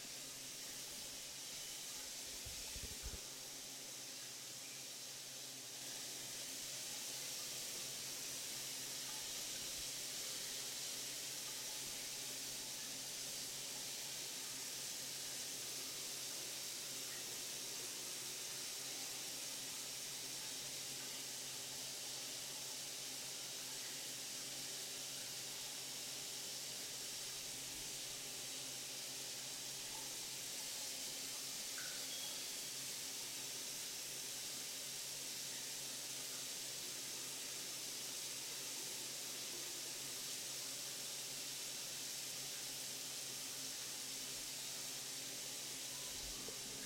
four water faucet bathroom flow
bathroom, drain, faucet, sink, water